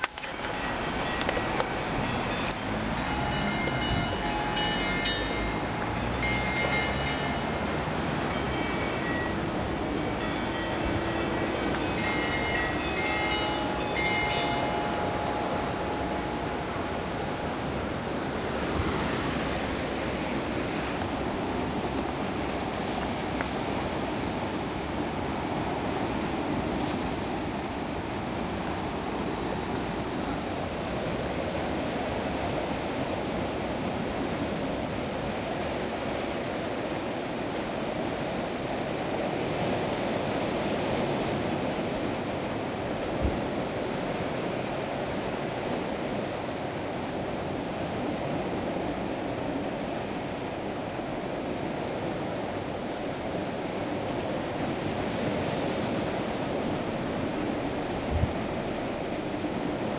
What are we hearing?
TSGiovannibells&surf
very musical chimes of the church clock in Torre San Giovanni, Salento, Italy, recorded on a Canon SX110
belltower, chimes, church-bells, clock, field-recording, italy